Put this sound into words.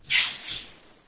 21077 johnnypanic 6-20-2006-after-eight Isolated
anomaly, evp, ghost, paranormal, phenomena, whisper